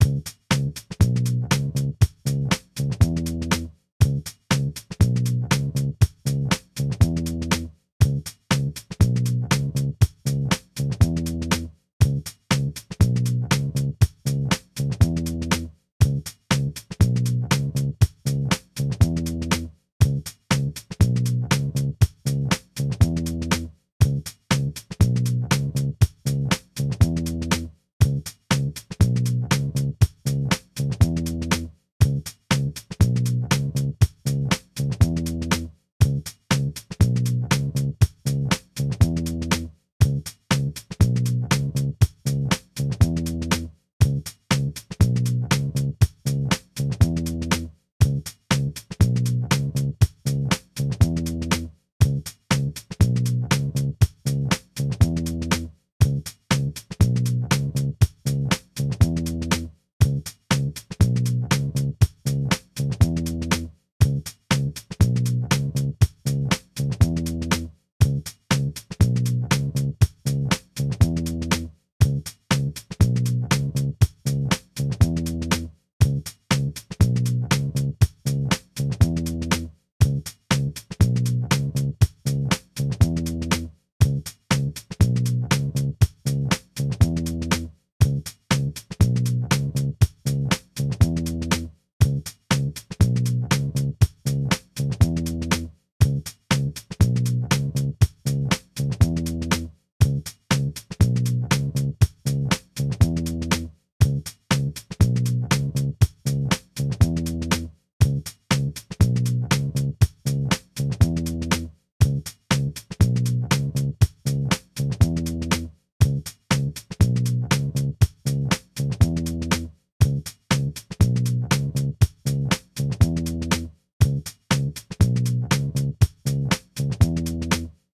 Bass loops 050 with drums long loop 120 bpm
120, 120bpm, bass, beat, bpm, dance, drum, drum-loop, drums, funky, groove, groovy, hip, hop, loop, loops, onlybass, percs, rhythm